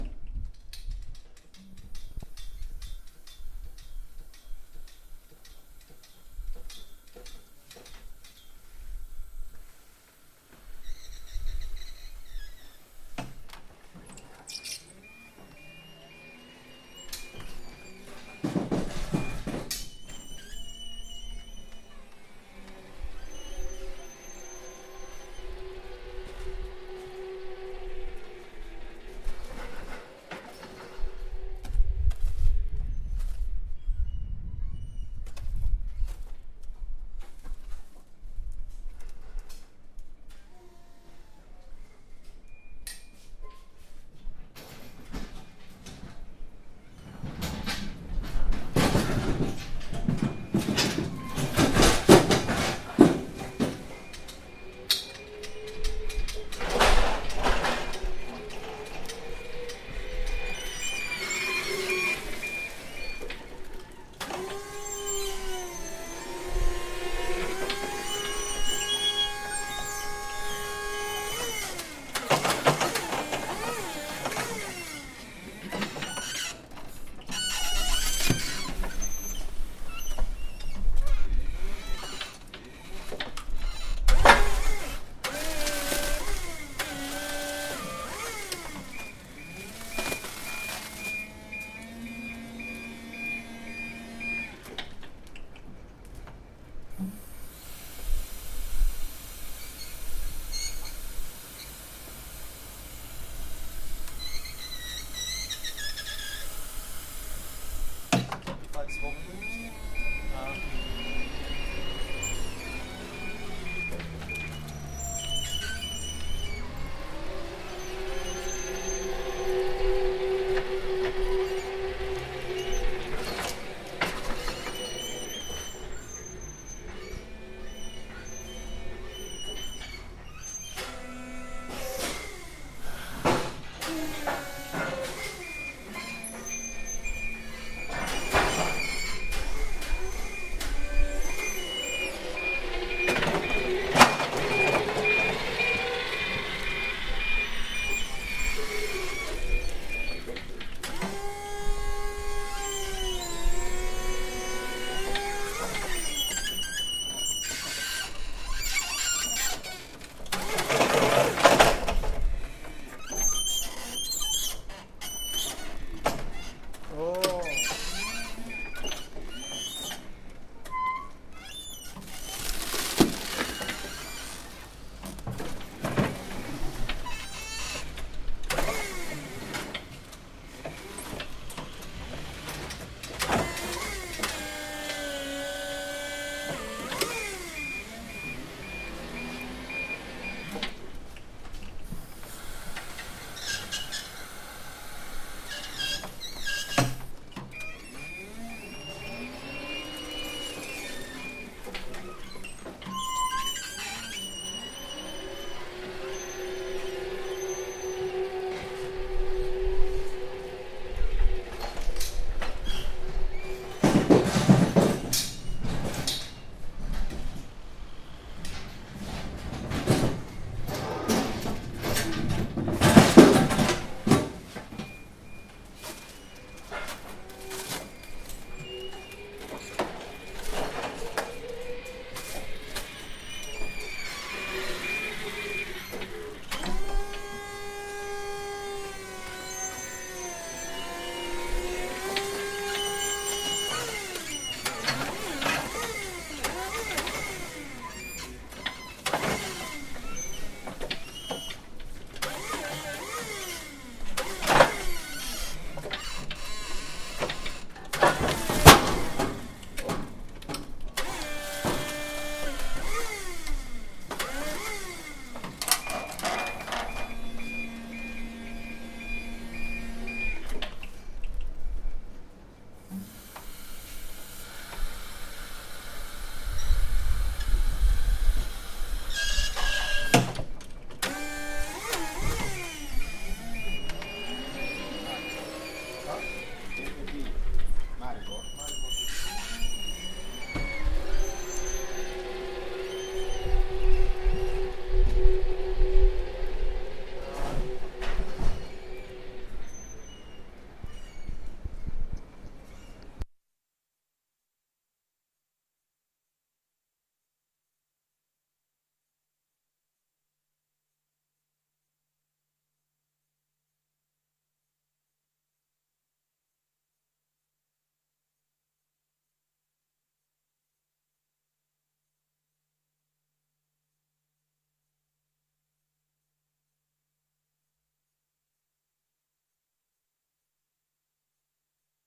electric forklift hydraulic stretch foil tear
electric, forklift, hydraulic